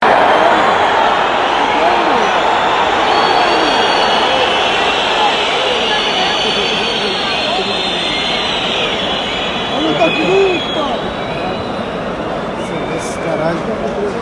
The sound of discontent people watching a football match in a stadium.